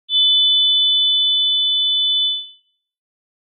By request - this is an FM synth approximation of the sound a two toned whistle would make. Similar to police whistles and the ones used in trench warfare of WWI.
Tones based around 3500Hz